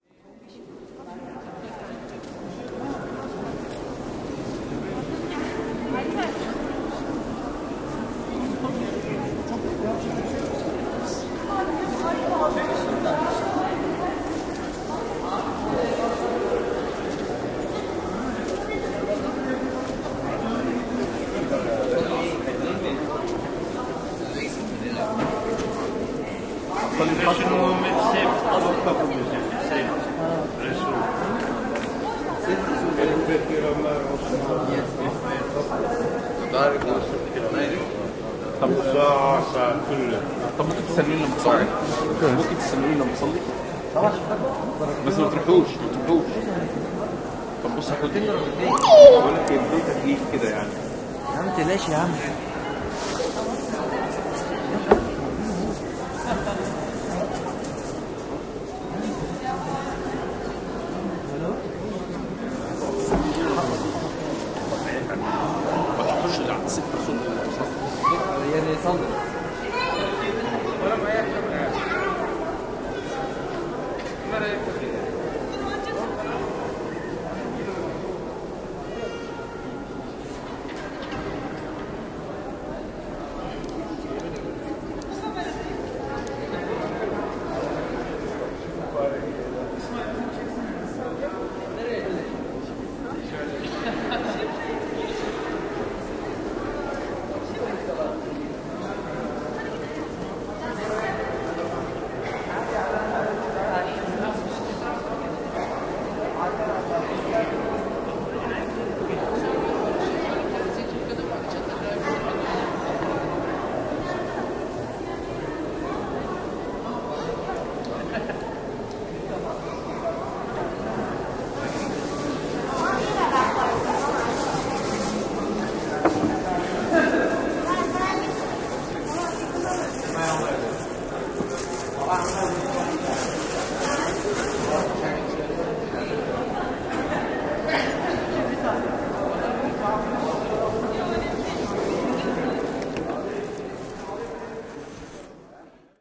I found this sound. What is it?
atmos BlueMosque
atmos of a crowd inside Blue Mosque in Istanbul Turkey 2010